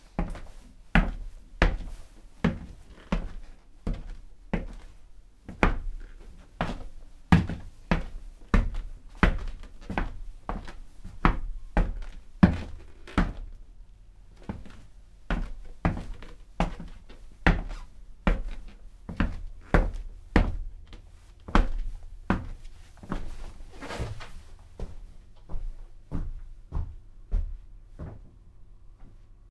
Walking around a small wood-floored room with heavy boots.
Recorded with a AKG C414 B-ULS for the Oxford Theatre Guild's production of A Government Inspector, 2012.